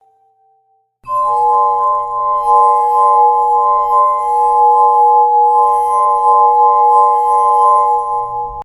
Magic Circle Long Ringing SFX
Great for magic circles in any game!
game-sound, magic, magical, magician, rpg, spell